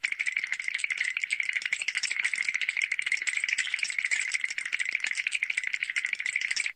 Ever needed that one teeth chattering noise from classic cartoons,well here's that one,and trust me,its the one,please enjoy. I just used audacity to record it from a website (can't quite remember what that website was)